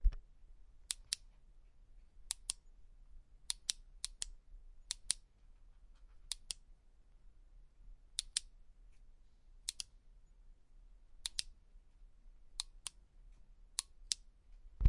Torch Click-Assorted
Assortment of clicking sounds made by a torch.
click, game, light, switch, torch